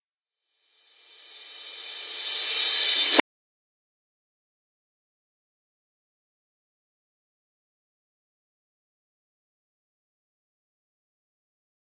Rev Cymb 1
Reverse Cymbal
Digital Zero
reverse, cymbal